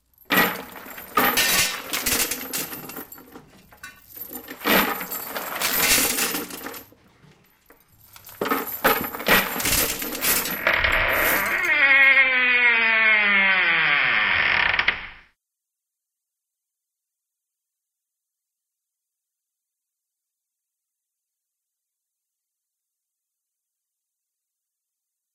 Director of "Rodky Horror Show" wanted a spooky sound effect when Riff Raff opens door.
door, creaky, spooky, chains